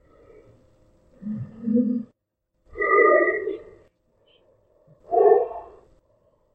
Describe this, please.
Creepy Breath2
Breath, Breath2, Creepy, CreepyBreath, CreepyBreath2, fivenightsatfreddy, fivenightsatfreddys, fnaf4, fnaffangame, survivewithspringtrap